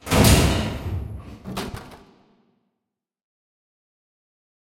Metal - Air/Ventilation shaft kicked open
I've created this sound for my project by layering a lot of sounds together, since I couldn't find it here.
ventilation, lid, cover, metal, air